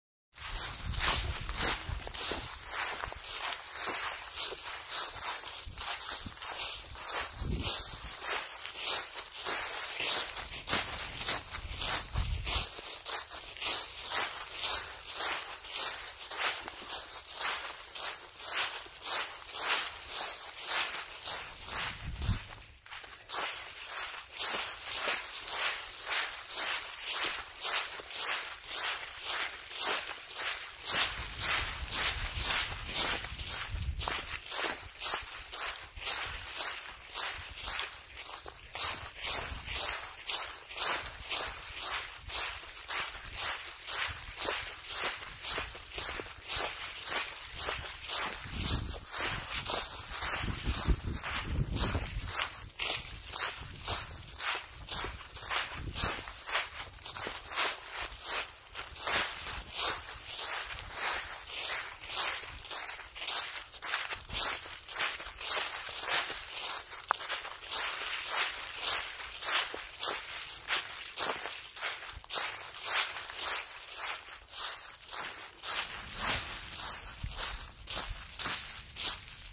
Autumn Leaves
Rustle of walking trough the road full of colorful leaves.
Recording taken in Oliwa Forest in Gdańsk, Poland (2018-10-21).